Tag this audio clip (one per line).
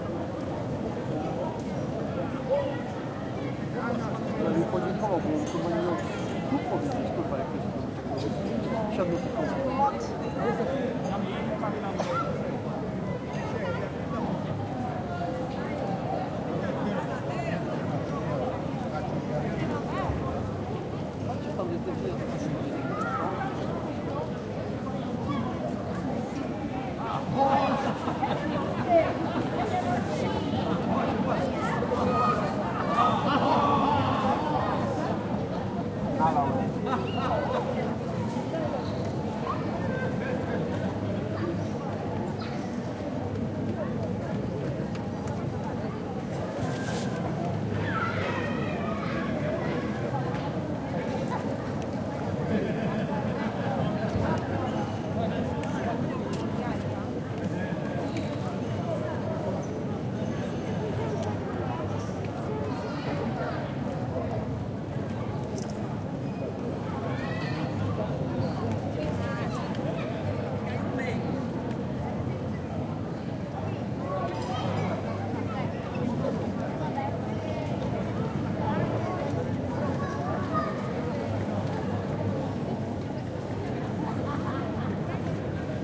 walla
Croatia
busy
city
people
street
external
traffic
Dubrovnik
bustle
crowd
surround
field-recording
mediterranian
noisy
ambience
4ch
tourist